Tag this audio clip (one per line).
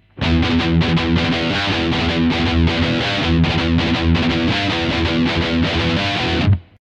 guitar
Metal
Electric
Riff